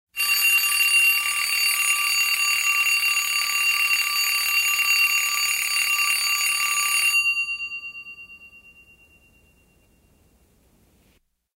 An old telephone ringing. Recorded by our tech crew with a 1970's era phone for a theatrical play at our school.